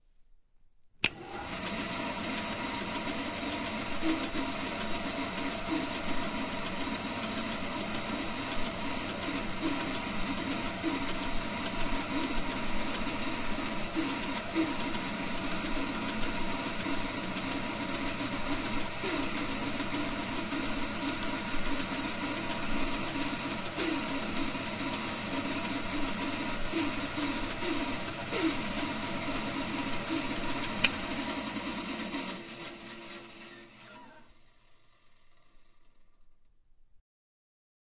Pack of power tools recorded in carpenter's workshop in Savijärvi, Tavastia Proper. Zoom H4n.